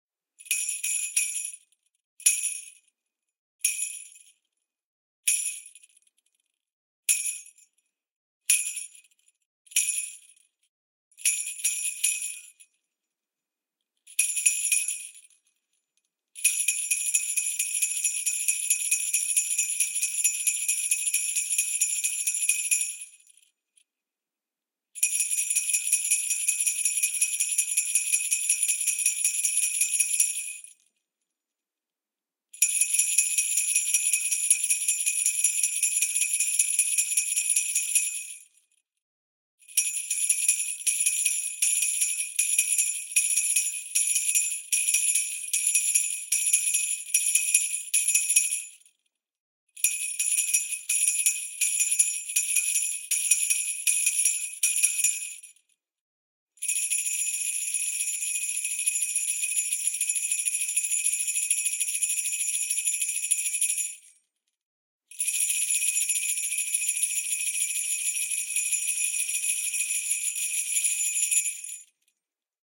Jingle Bells
Sound of Christmas bells
bells, Christmas, cold, freeze, frost, holiday, ice, ringing, Santa, snow, winter, year